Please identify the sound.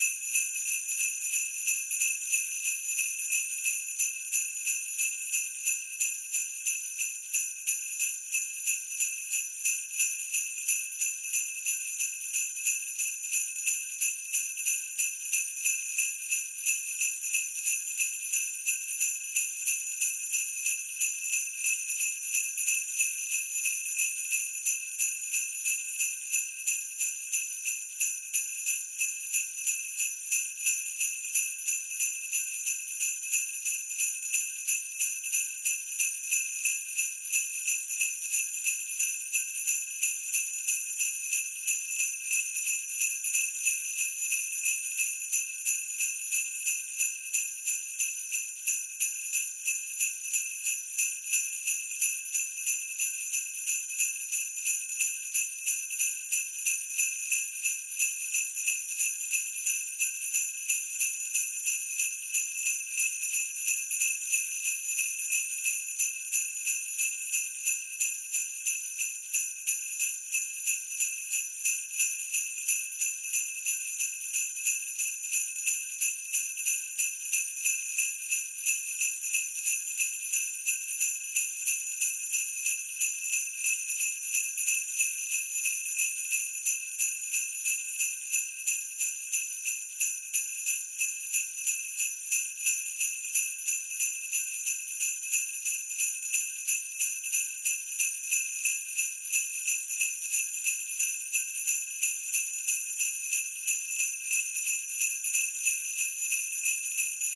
Sleigh bells 90 bpm loop
After working for the last several years on holiday spots that required the need of sleigh bells, I finally decided to get my own set of sleigh bells. All the ones online I could find didn't pass quality control. Here is a loop I created at 90 BPM. Hopefully this comes in handy for someone who was in my position the last few years. Enjoy!
90bpm, bells, Christmas, Claus, holiday, jingle, Santa, sleigh, Xmas